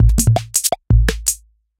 weird 2 break166
Another loop at 166BPM, glitchy, minimal, weird, useless.
166bpm, loop